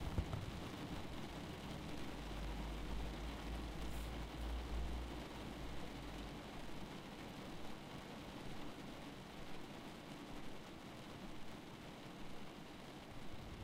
Rain on Car
Recording made while sitting in a car in the rain. This is the sound of the rain hitting the roof and windows of the car. It is a quieter recording.
rain, raindrops